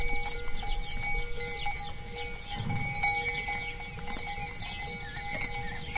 sheepbells day
sheep bells ringing in the open, some wind noise and a distant Starling callings /esquilas de ovejas, exterior, ruido de viento y un tordo lejano
andalucia, nature, sheep-bell, south-spain, field-recording